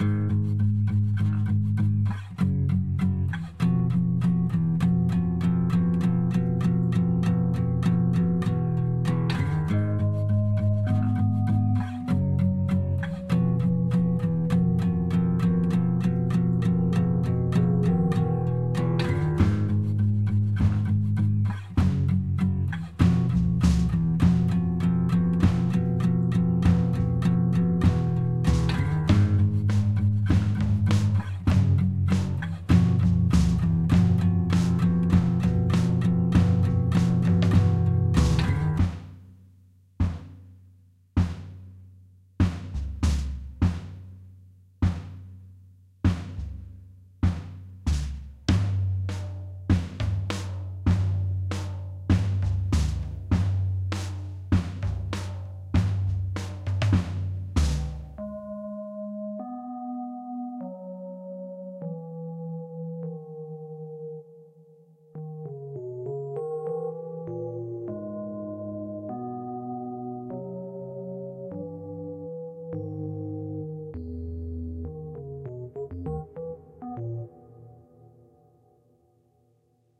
guitar drums piano loops, its a bit fast for a dirge...